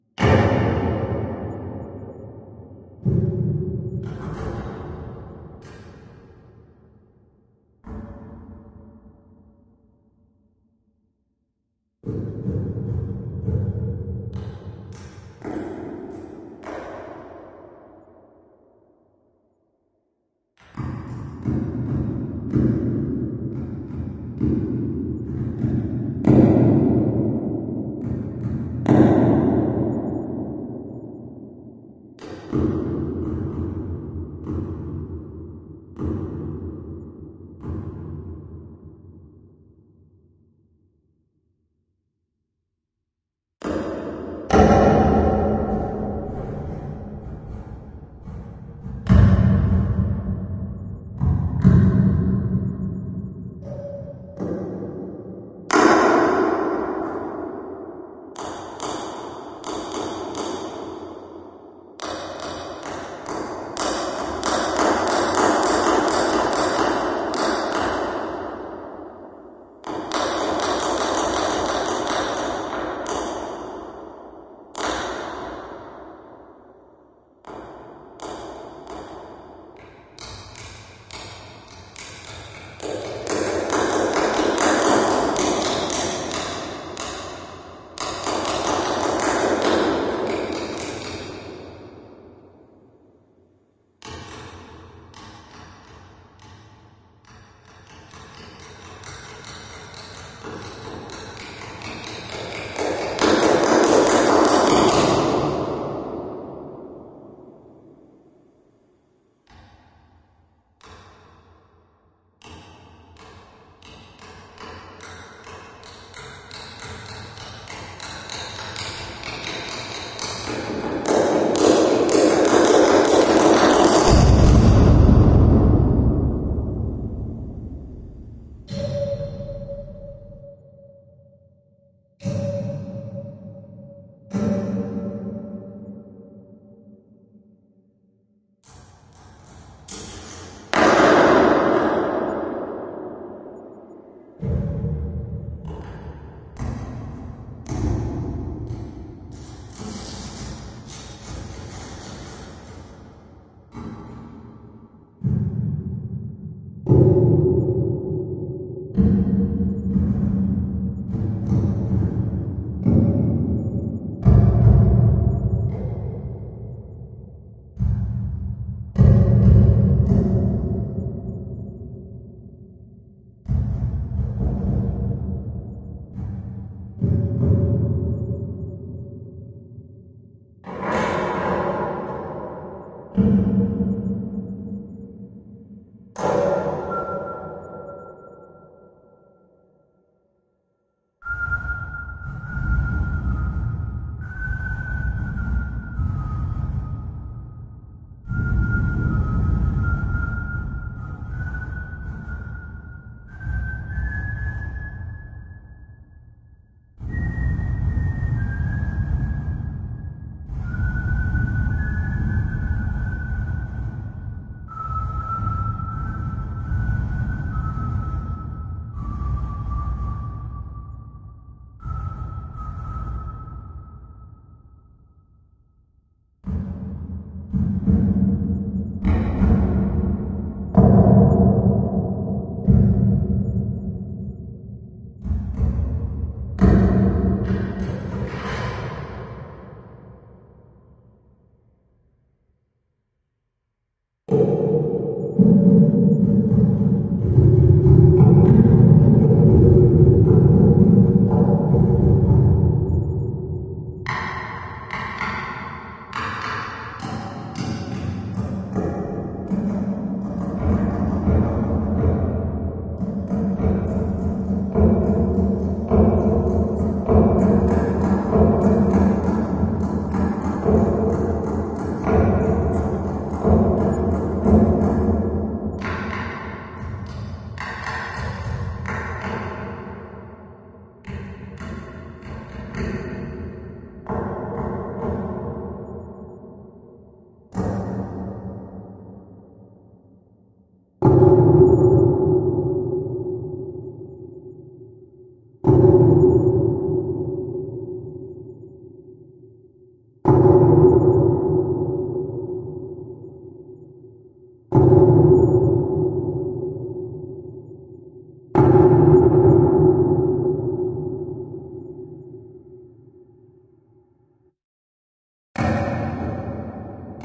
reverb tapping
This is a recording of me tapping my desk and dropping small items, like my calculator, bottle caps, and gum wrappers. I even improv a creepy whistling tune in it.
I used the Fruity Convolver to make it sound awesome.
convolver, creepy, reverb, tapping